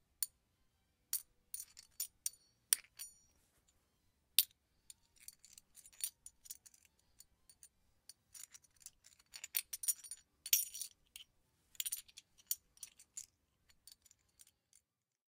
Handling 4 coins (Small/Medium/Large Size)
(Recorded at studio with AT4033a)